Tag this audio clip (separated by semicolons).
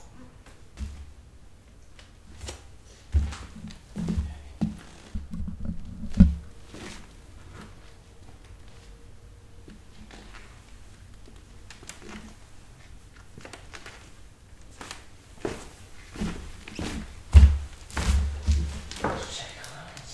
creaky; squeaking; OWI; walking; squeak; footsteps; creak; wood; floor; squeaky; hardwood; footstep; hardwood-floor; creaking